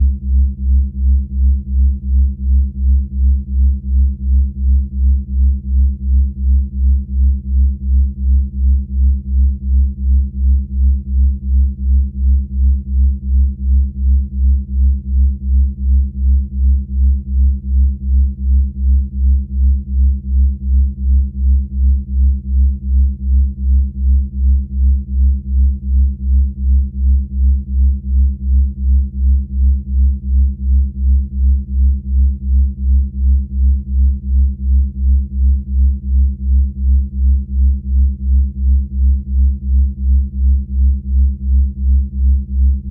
system 100 drones 12
A series of drone sounds created using a Roland System 100 modular synth. Lots of deep roaring bass.
ambience, analog-synthesis, background, bass, bass-drone, deep, drone, low, modular-synth, oscillator, Roland-System-100, synthesizer, vintage-synth